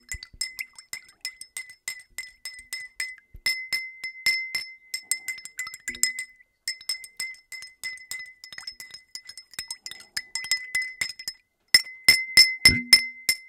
we can listen sounds recorded at home.
france, rennes, sonicsnaps, lapoterie